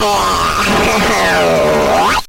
Dumb EFX - 22
my voice though a homemade effect box. kind of a bit-crusher, phaser, pitch-shifting thing. very lo-fi because I like that kind of thing.
electro, lofi, circuit-bent, glitch